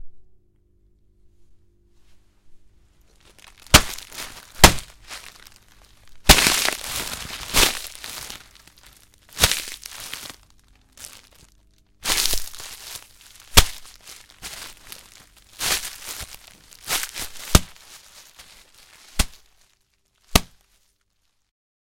Punching a face
This sound was recorded exactly the same as my previous punching sound. I put a a full lettuce into a plastic bag and punched it repeatedly. Then I added a low pass EQ in order to create the sound of punching a body, so that you don't hear the scratchy tone of the plastic.
human-Body, a, punch-Face, punch, Punching